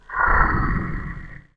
As my other sounds seemed helpful and I am really proud of myself, I decided to try and make a new one!
Once more, I recorded myself growling and changed settings using Audacity. I changed tone, speed and removed noises.